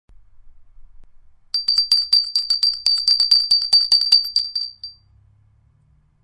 Bell, ringing, ring
ringing, ring, Bell